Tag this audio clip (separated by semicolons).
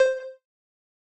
game; user-interface; beep; click; videogam